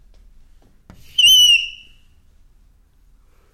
A chalk screeching on a blackboard - interior recording - Mono.
Recorded in 2012